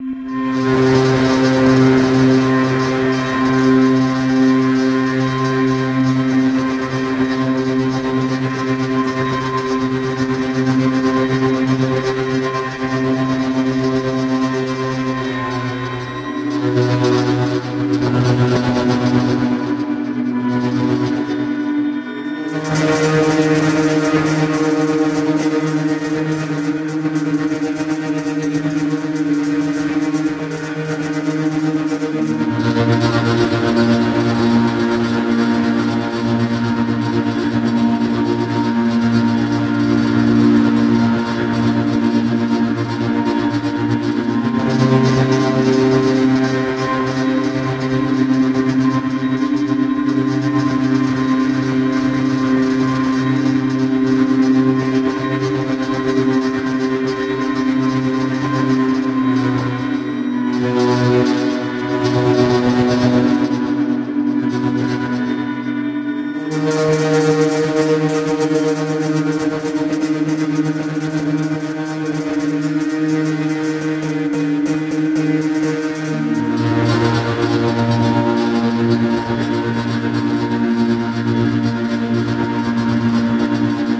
Drone Synth Dark Dramatic Atmo Background Cinematic
Horror, Amb, Environment, Spooky, Ambient, Fantasy, Scary, Ambiance, Dark, Thriller, Drone, Sound, Dramatic, Film, Strange, Synth, Background, Sci-Fi, Atmosphere, Atmo, Sound-Design, Action, Cinematic, Ambience, Movie, Creepy